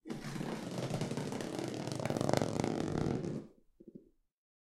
Plastic ball rolling 2
A small plastic ball (hard plastic) rolling on my kitchen floor. I believe it is a stress ball. The rolling of the ball was achieved with the help of a dear friend.
ball, floor, Plastic, rolling, stressball, toy